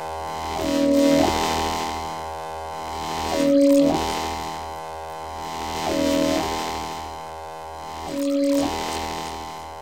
created with Yamaha TG-33 Tone generator sound
synth, yamaha, tone, noise, experimental, tg-33, generator